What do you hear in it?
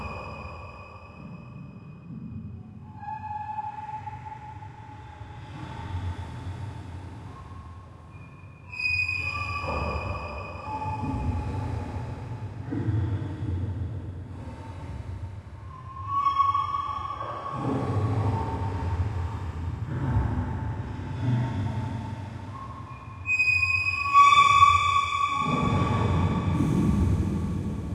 Drone Dark Ambient Horror
creaking drawer with reverb FX Chain